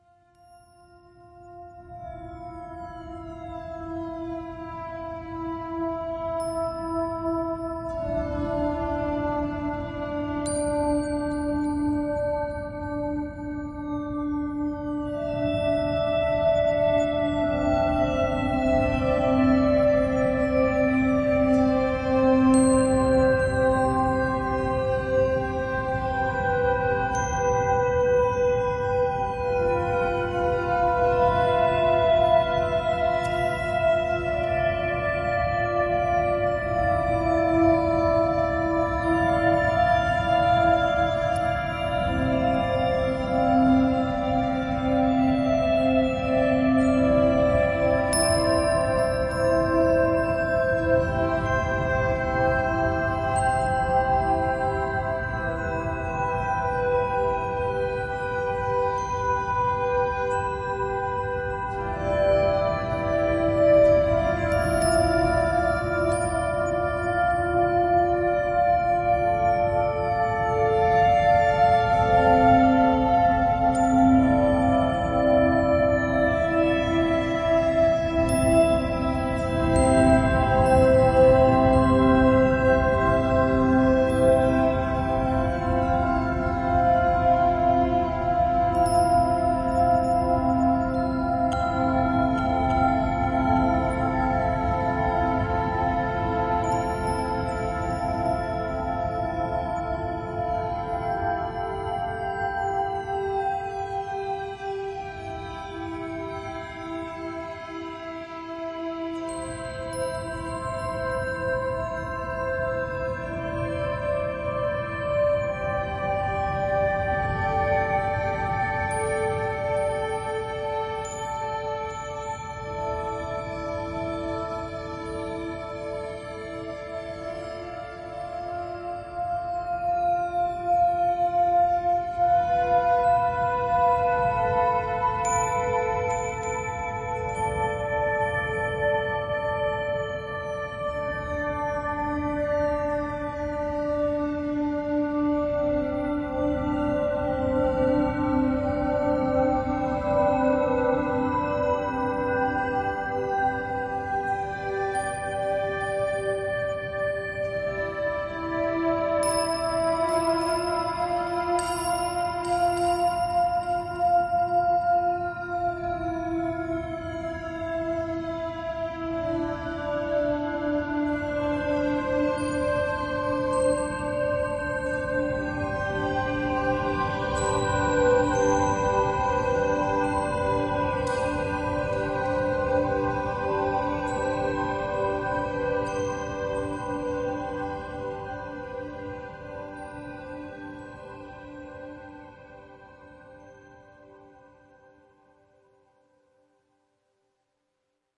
Simple "dreamscape" made with Native Instruments Reaktor 6, edited in Audacity.